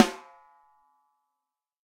KBSD-E22-VELOCITY7
This sample pack contains 109 samples of a Ludwig Accent Combo 14x6 snare drum played by drummer Kent Breckner and recorded with eight different microphones and multiple velocity layers. For each microphone there are ten velocity layers but in addition there is a ‘combi’ set which is a mixture of my three favorite mics with ten velocity layers and a ‘special’ set featuring those three mics with some processing and nineteen velocity layers, the even-numbered ones being interpolated. The microphones used were a Shure SM57, a Beyer Dynamic M201, a Josephson e22s, a Josephson C42, a Neumann TLM103, an Electrovoice RE20, an Electrovoice ND868 and an Audio Technica Pro37R. Placement of mic varied according to sensitivity and polar pattern. Preamps used were NPNG and Millennia Media and all sources were recorded directly to Pro Tools through Frontier Design Group and Digidesign converters. Final editing and processing was carried out in Cool Edit Pro.
14x6, accent, beyer, breckner, combo, drum, drums, electrovoice, josephson, kent, layer, layers, ludwig, mic, microphone, microphones, mics, multi, neumann, sample, samples, shure, snare, technica, velocity